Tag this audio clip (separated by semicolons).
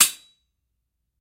bali; gamelan; percussion